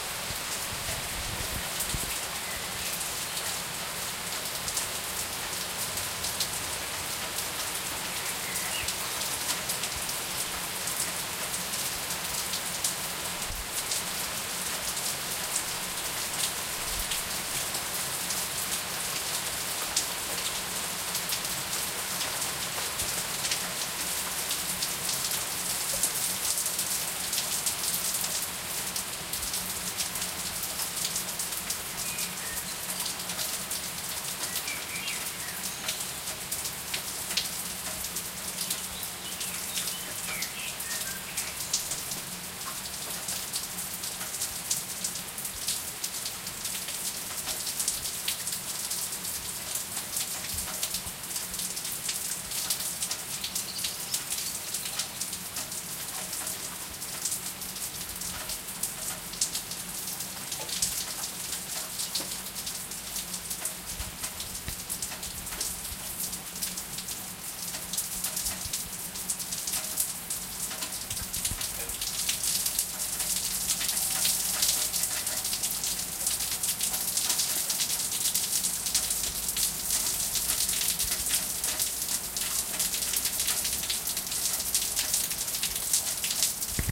Blackbird in the rain
Heavy rain is falling, but there is still one blackbird singing now and then
recorded with a Tascam DR40 field recorder
blackbird, rain